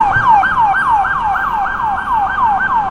WaHi siren to loop
Loopable NYC siren, could be used for ambulance or police.
siren, York